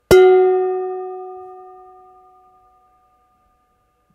hitting my kitchen pan